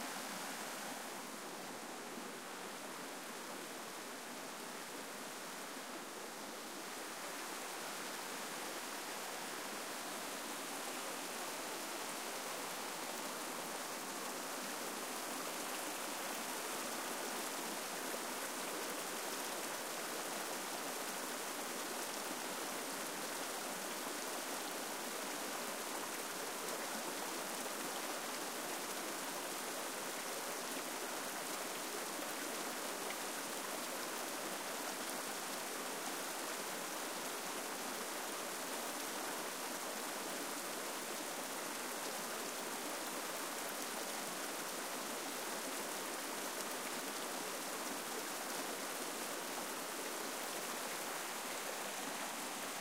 All in pack recorded today 3/29/14 on the Cataract Trail on Mt. Tam Marin County, CA USA, after a good rain. Low pass engaged. Otherwise untouched, no edits, no FX.
babbling, creek, gurgle, stream, water